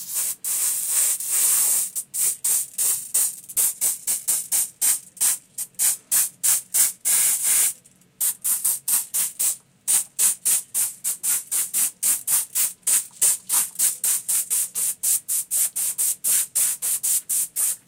Aerosol spray can - Binaural
Here's a short recording of an aerosol spray can recorded with a binaural setup.
** USE HEADPHONES FOR THIS RECORDING**
spray-can, air-freshener, field-recording, aerosol, binaural-imaging, headphones, binaural, dummy-head